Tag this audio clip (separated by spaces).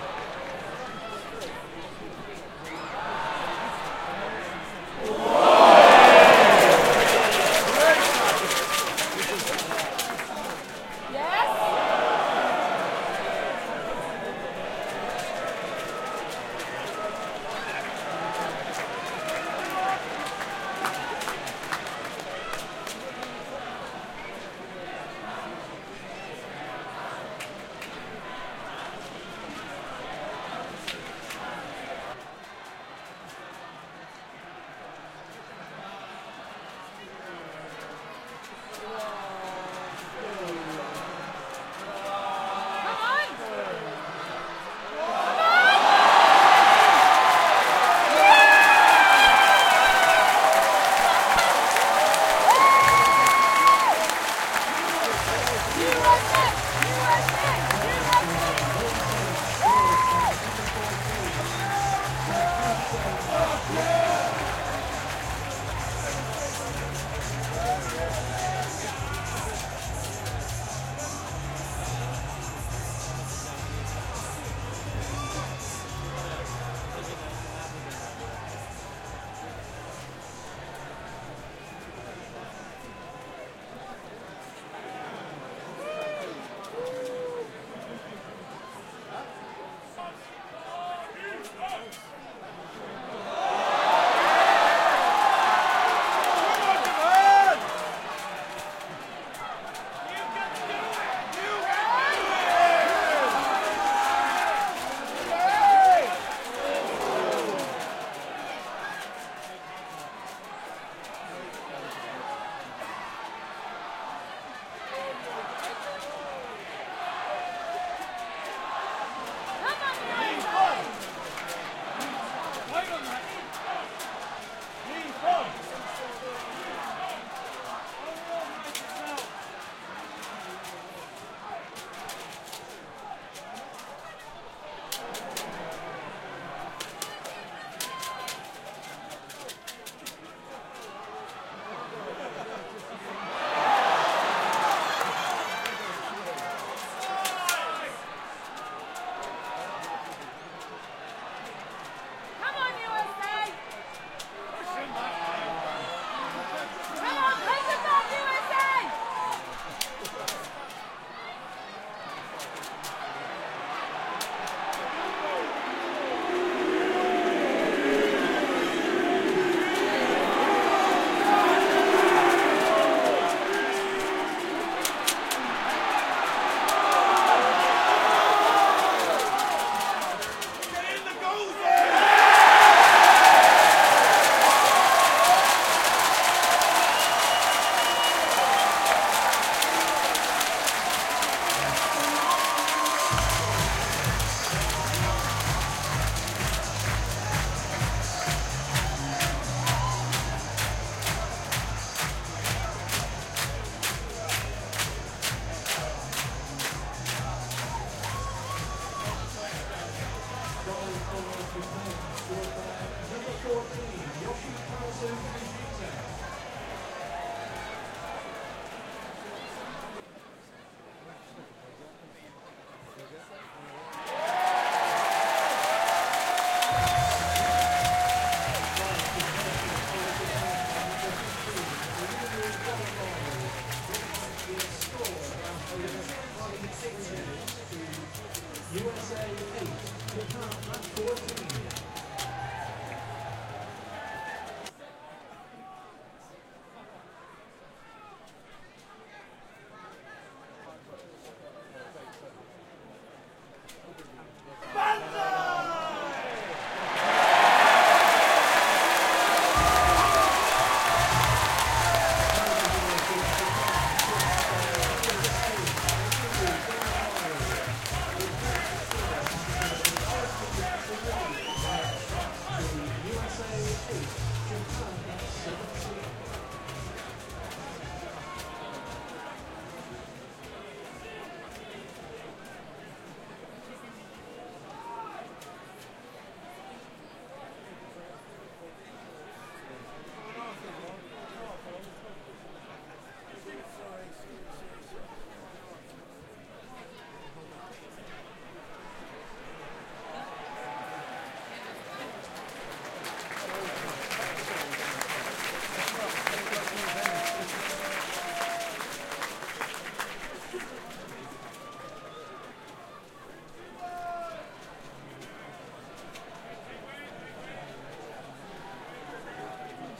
boo cheer Crowd football rugby Sports